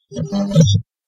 Sounds like a door on a transformer opening or shutting.